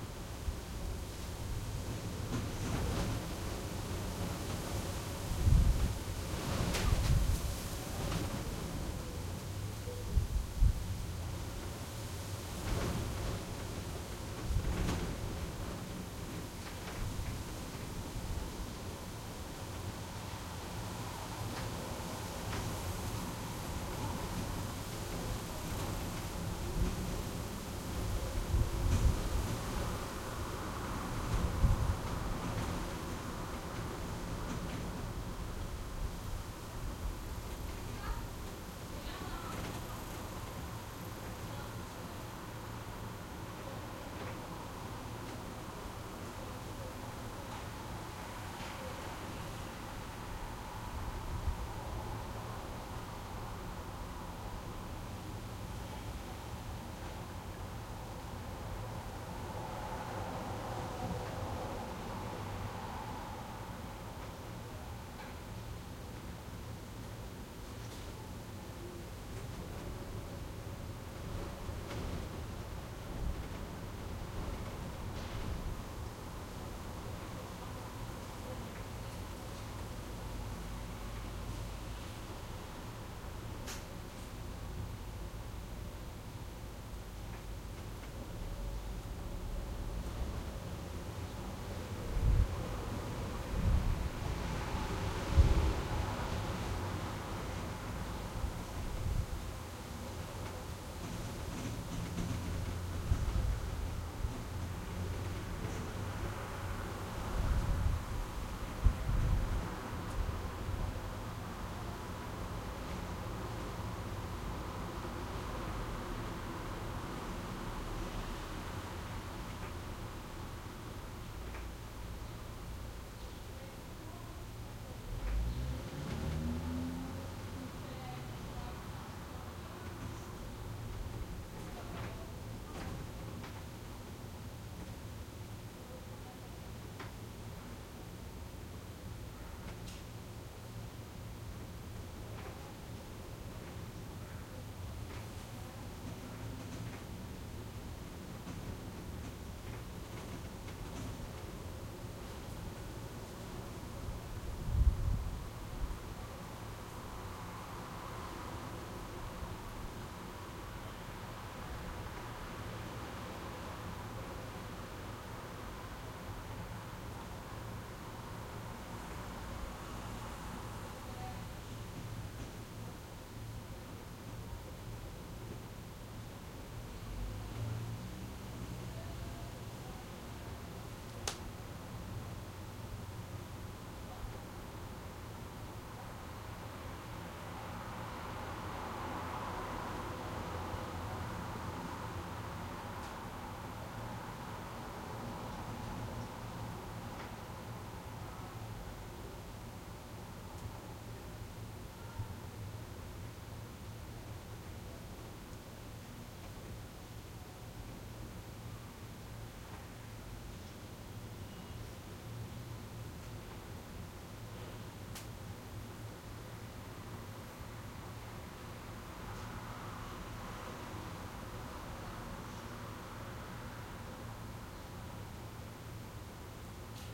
wind gusty through quiet studio full of junk wood rattle metal window frame trees hiss outside
recorded with Sony PCM-D50, Tascam DAP1 DAT with AT835 stereo mic, or Zoom H2
trees, full, outside, quiet, studio, junk, hiss, metal, window, gusty, rattle, wood